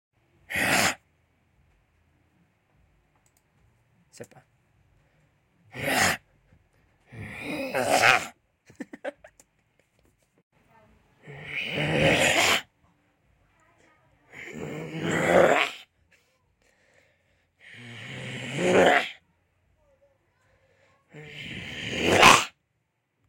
Witch Attack
Scary, Attack, Witch